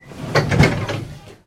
London Underground- train doors closing (3)
Just an organic sound of the doors closing on a tube train. No announcements or warning beeps, unlike my other recording of Bakerloo line doors. Recorded 19th Feb 2015 with 4th-gen iPod touch. Edited with Audacity.
bakerloo, doors, close, subway, tube-train, 1972-stock, tube, london-underground, train, bakerloo-line, underground, metro, london, field-recording, depart